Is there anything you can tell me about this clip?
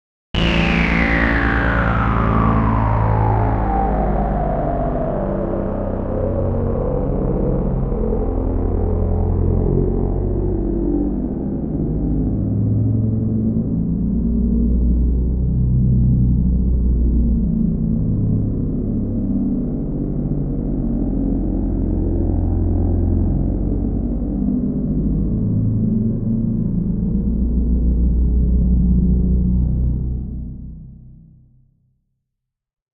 Fall-And-Sweep
A falling energy synth tone with a little slow sweep in the tail
drone, sound-effect, sweep